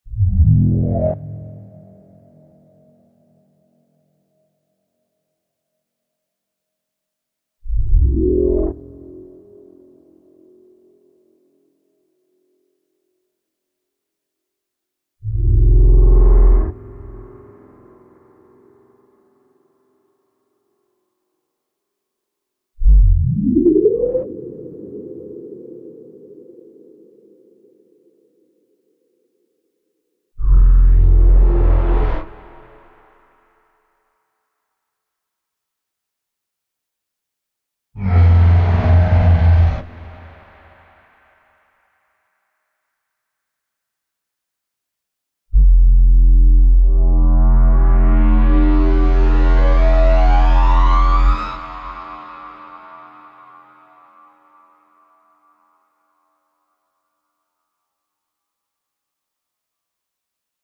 Sci-Fi FX Compilation
Some kind of sci-fi woosh effects.
fx, creepy, artificial, freaky, effect, dark, sfx, woosh, swish, scifi, fly-by, abstract